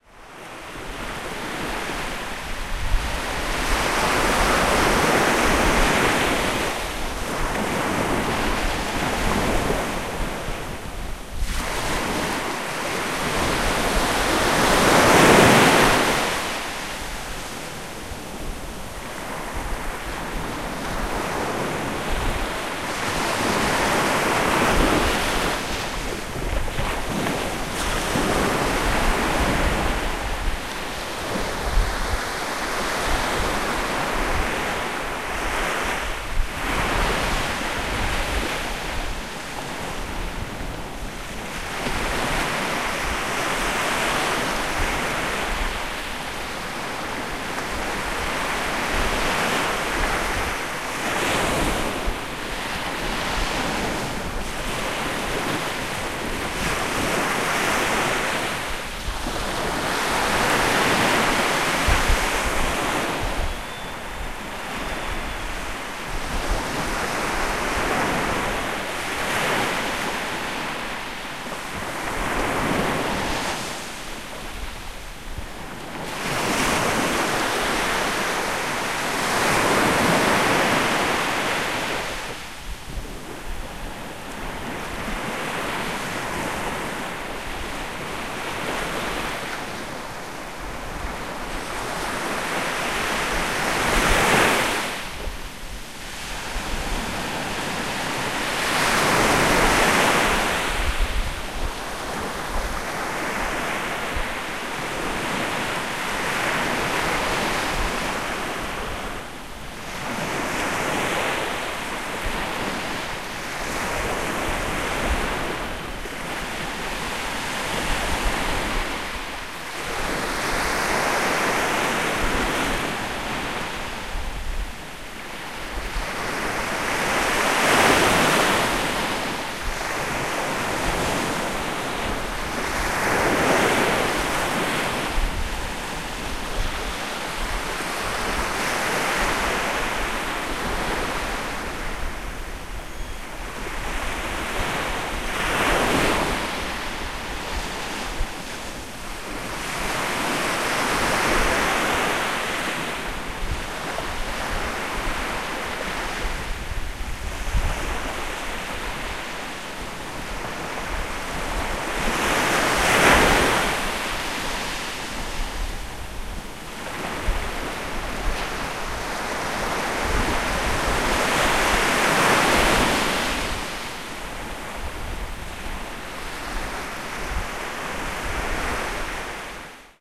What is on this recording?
Midway Island Surf
The sounds of the surf lapping up on the coral sand beach at Midway Island. Recorded in April of 2001. The waves never get very large as there is a coral reef several miles out that breaks up the large ocean swells. This is one of the few spots around the island that the Gooney Birds do not tend to congregate. As a result, you won't hear them here except for very faintly in a couple spots. Field recording using a Sony mini disk recorder. Transferred to digital via an analog path since I had no method for copying the digital file from the mini disk.
Atoll, Beach, Coral, Island, Midway, Ocean, Reef, Sea, Stereo, Surf, Waves